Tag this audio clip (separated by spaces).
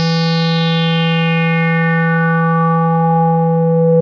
square synth